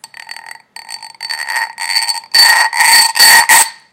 CARDOT Charlotte 2018 son4
This sound has been recorded. I created it with a knife and a plate.
bruit, enregistrer, sons